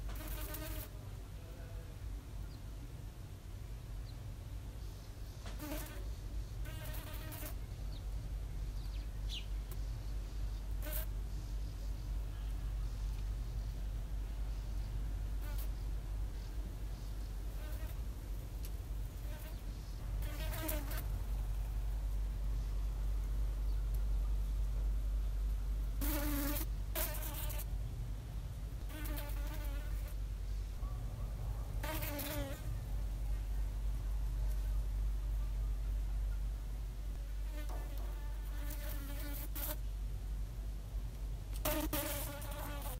A small fly trying to fly out but is blocked by the window's insect net. Buzz sounds mixed with outdoor ambient noise in a quiet desert town in Israel. Tried to help it out but it wanted to stay put. Recorded it with my iPhone SE internal mic.